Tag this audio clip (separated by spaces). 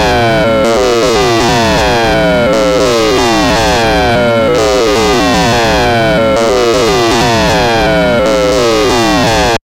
soundeffect drone noise experimental sci-fi